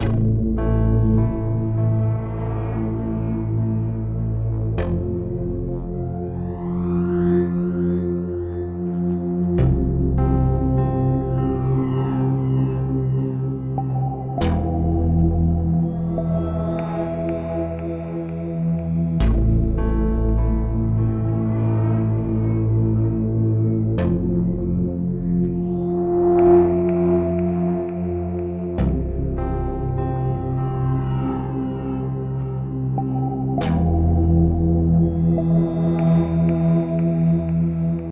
"Aurora" Loop
Synths:Ableton live,Silenth1,kontakt,masive.
ambiance, ambience, ambient, atmosphere, dark, electronic, loop, music, original, sounds, soundscape, synth